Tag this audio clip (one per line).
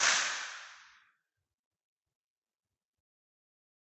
Buddhist
Impulse-Response
Meditation-Hall